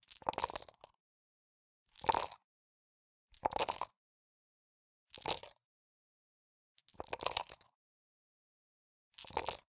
Dice (6) in cup in hand
The name describes what it is: eg. Dice (3) in cup on table = Three dice are put in a cup which stands on a table.
The sounds were all recorded by me and were to be used in a video game, but I don't think they were ever used, so here they are. Take them! Use them!
game, foley, yatzy, die, dice